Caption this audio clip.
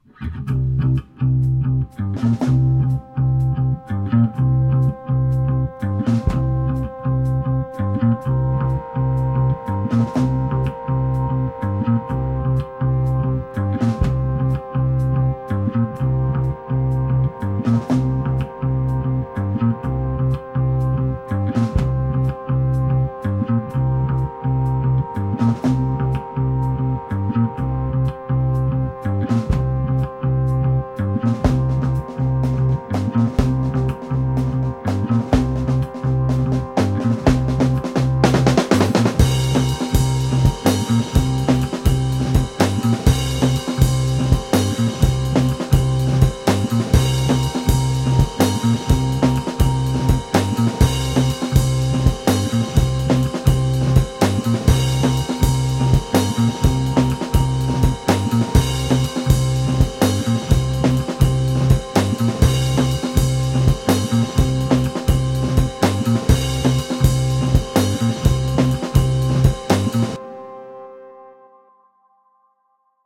Groovy Bass Action Theme Music
A cool, upbeat action theme song using bass + drums + synth.
bass, bump, channel, film, guitar, intro, logo, music, mysterious, news, podcast, rock, synth, upbeat